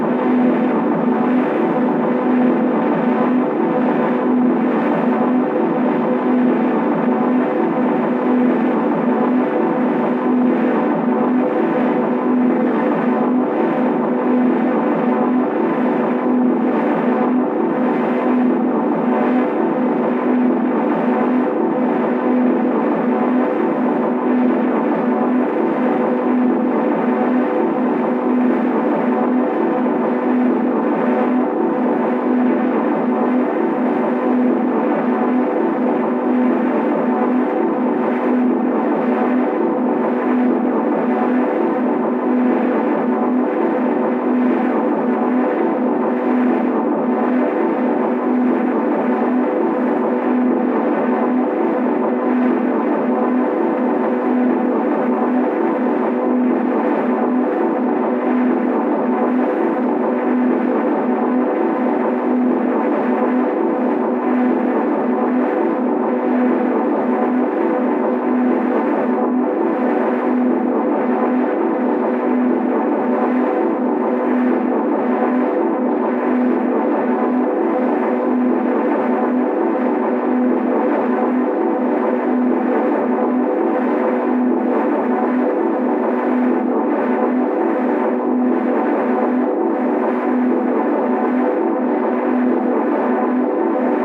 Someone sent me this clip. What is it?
Leftovers from the grain machines.
ambient, atmosphere, bass, drone, echo, granular, loop